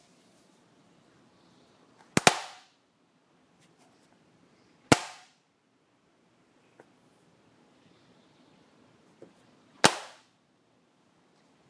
Magnet on refrigerator
A thin refrigerator magnet taken on and off a metal refrigerator door
magnet, door, fridge, refrigerator, thin